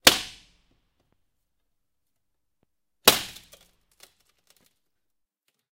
Windows being broken with vaitous objects. Also includes scratching.
break, breaking-glass, indoor, window